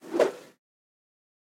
whoosh sample
Whoosh sfx made from a cable. Recorded through a cardioid Oktava MK012.
foleys, sfx, whoosh